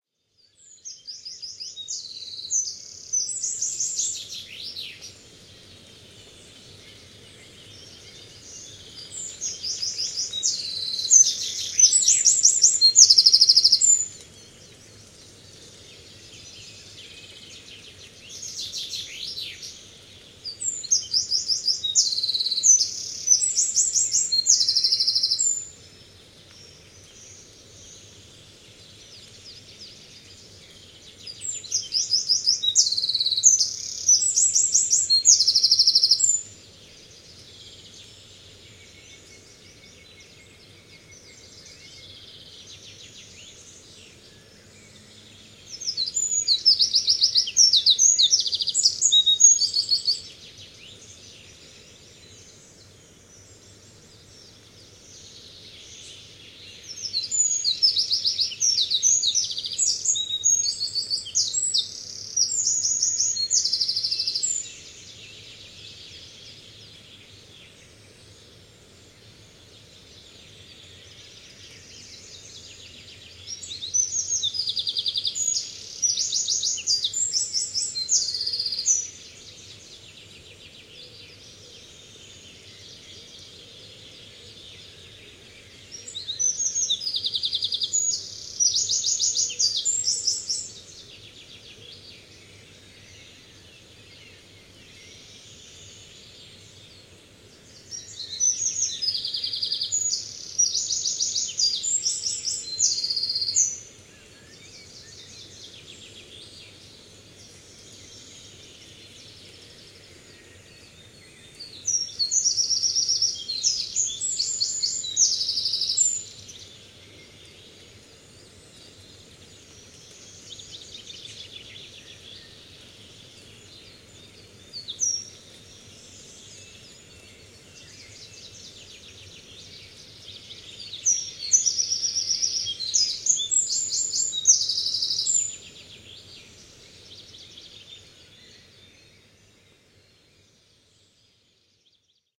Wren (Troglodytes troglodytes) singing in a forest of the northern Sauerland region in Germany at a rainy dawn (5:30 am) in May. Vivanco EM35 on parabolic dish with preamp into Marantz PMD 671.